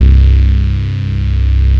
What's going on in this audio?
SYNTH BASS 0205
SYNTH SAW BASS
bass, saw, synth